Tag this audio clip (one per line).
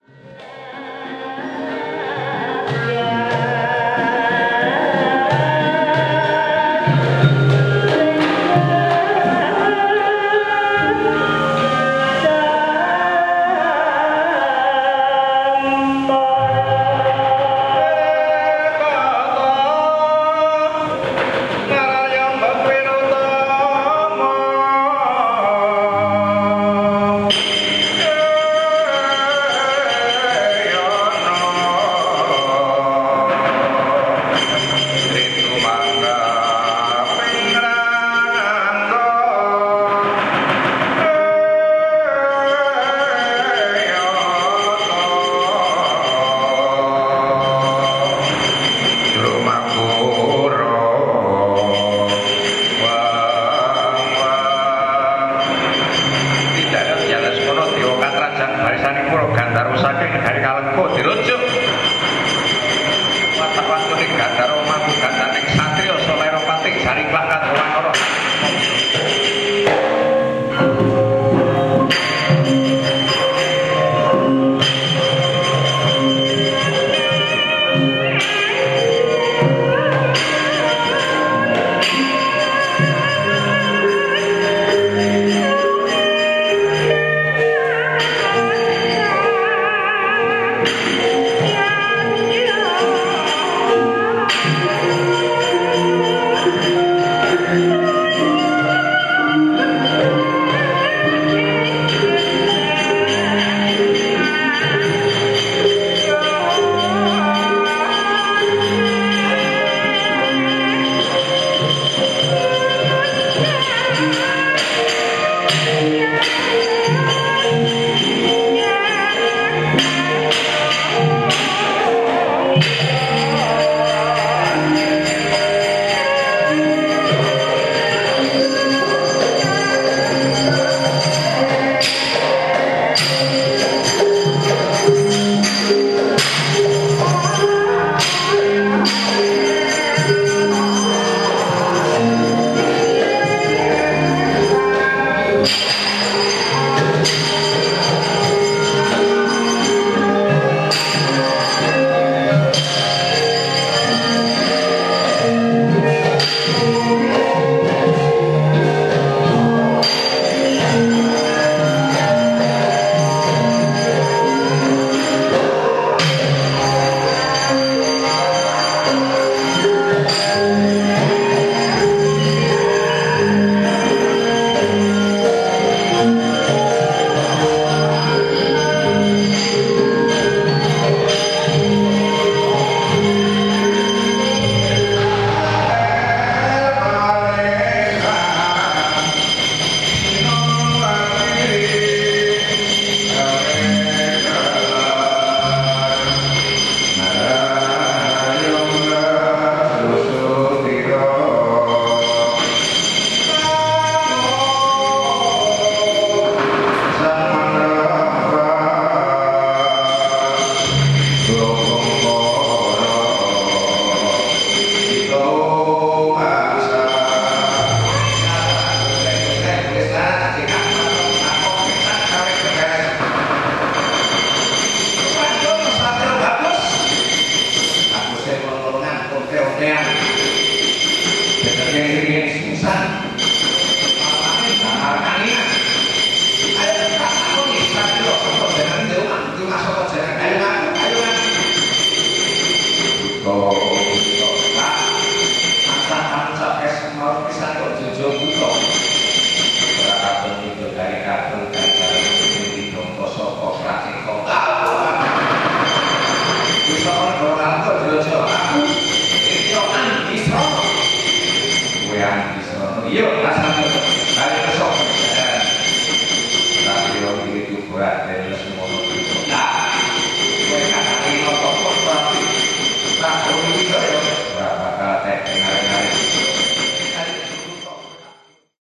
public-culture-performance
field-recording
public-teater